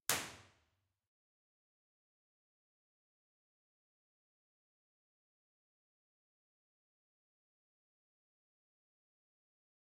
ESMUC Choir Hall IR at Mid Right
Impulse Response recorded at the Choir Hall from ESMUC, Barcelona at the Mid Right source position. This file is part of a collection of IR captured from the same mic placement but with the source at different points of the stage. This allows simulating true stereo panning by placing instruments on the stage by convolution instead of simply level differences.
The recording is in MS Stereo, with a omnidirectional and a figure-of-eight C414 microphones.
The channel number 1 is the Side and the number 2 is the Mid.
To perform the convolution, an LR decomposition is needed:
L = channel 2 + channel 1
R = channel 2 - channel 1
esmuc, impulse-response, ir, reverb